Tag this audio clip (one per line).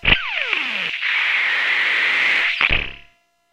digital
fm
glitch
hollow
modular
modulation
noise
nord
synth